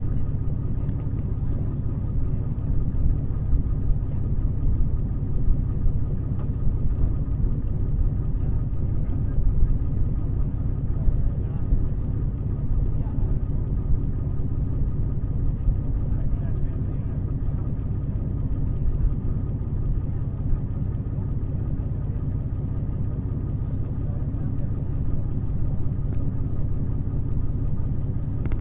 Field recording on a ferry boat (diesel engine).
Recorded with Zoom H1